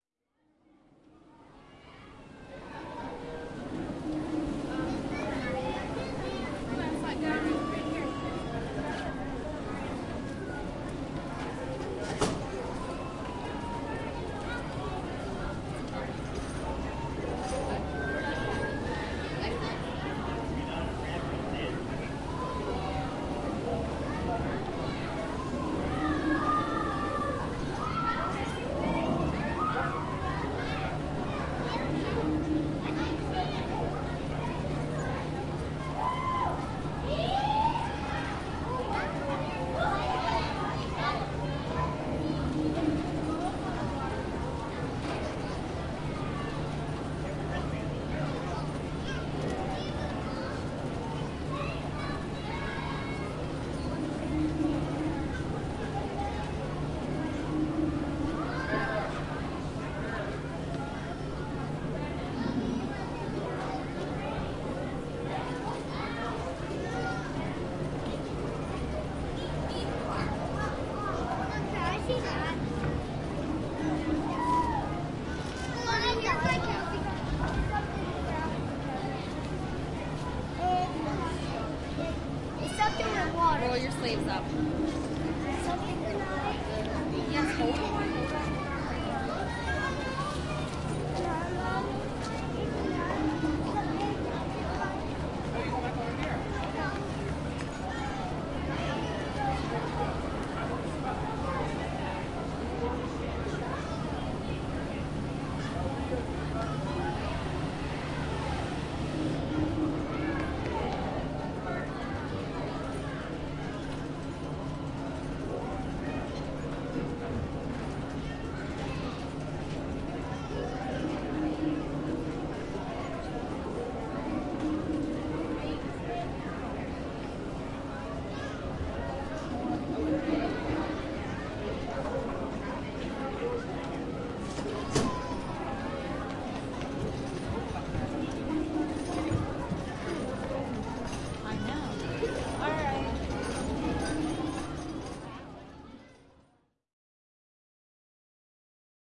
Ambiance of lobby at the Carnegie Science Museum in Pittsburgh